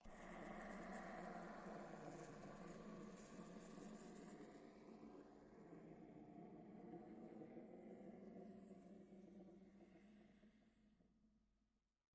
FIDGETSPINNER table
fidgetspinner, sound, table